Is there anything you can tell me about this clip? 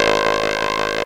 Sound effect made with VOPM. Suggested use - Ambient generator loop
video-game, game, FM-synthesis, sound-effect, VOPM